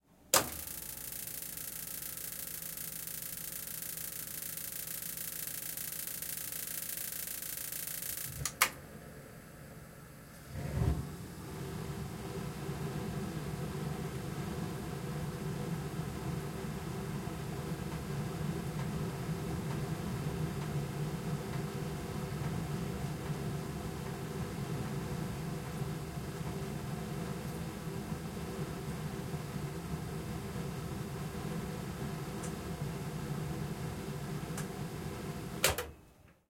Switch on Water-heater
Switching on a water heather.
appliance, burner, click, clicks, heater, Home, ignite, machine, switch, water